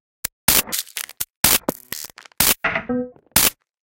SnaredArtifacts 125bpm01 LoopCache AbstractPercussion
Abstract Percussion Loops made from field recorded found sounds
Percussion, Loops, Abstract